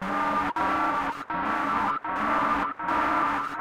three-one
folded looped pitched up and down
bass, drums, old